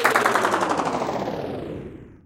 Time's up 03
This sound is created from aplause recorded by IC recorder and apply Delay in Audacity:
Delay tipe: Bouncing ball
Delay level per echo: 1,25 dB
Delay time: 0,100
Pitch change effect: pitch/tempo
Pitch change per echo: -1,0%
Number of echoes: 30